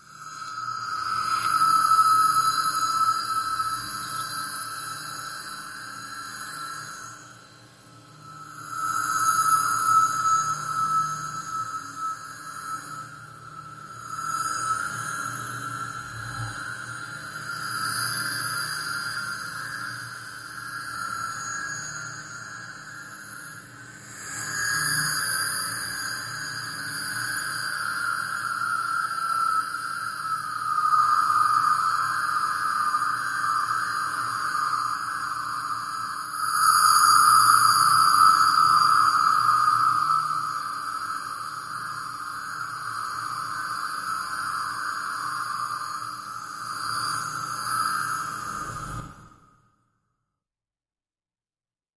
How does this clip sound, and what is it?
Noisy alien sound
rev vs revrev
recorded thru MacBook mic, using Adobe Audition. added reverb and reverse reverb. 2016.